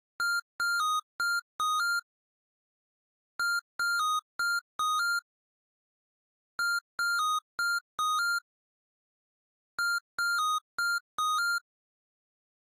8-Bit Jingle 1
A short, positive 8-bit jingle made in Beepbox.
jingle, retro, video-game, 80s, chiptune, 8-bit, beepbox